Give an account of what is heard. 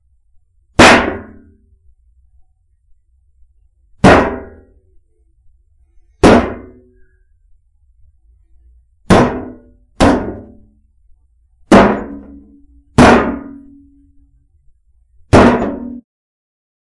Used for any banging of metel.